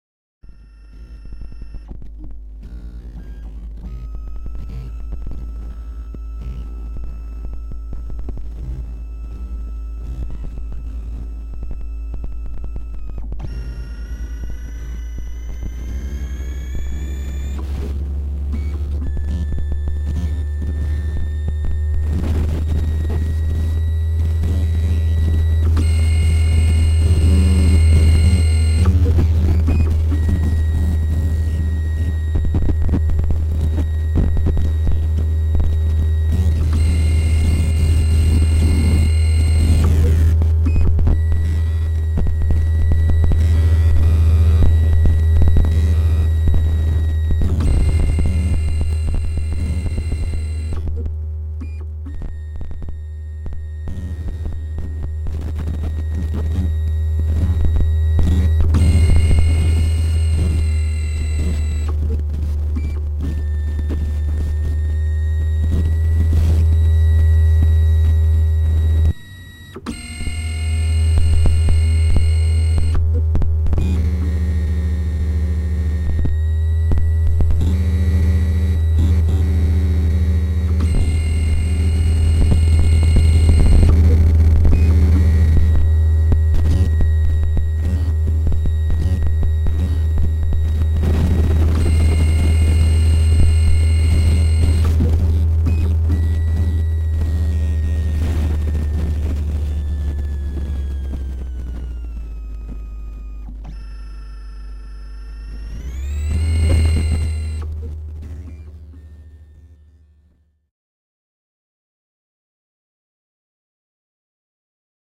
Digital Takeoff
Sound experiment: Like a flatbed scanner taking off into space
abstract, digital, electronic, experiment, glitch, lo-fi, modulation, noise, sci-fi, sound-design, synthesis